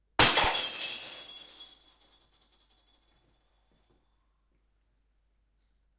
Sound of a ceramic cup breaking after being dropped from a height of about 1 metre onto a tiled kitchen floor.